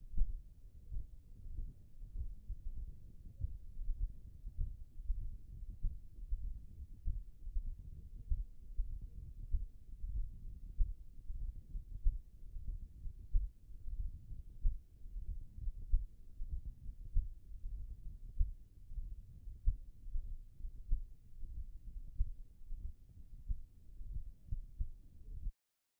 The sound of a irregular heart beat.